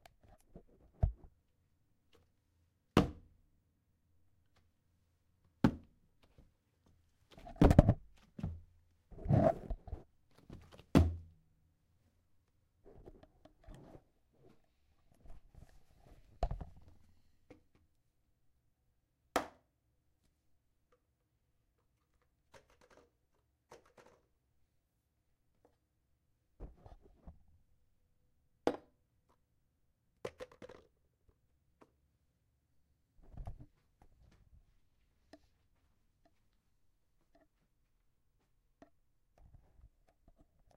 hits bathroomStomp
Impulse hits and stamps from within my upstairs bathroom.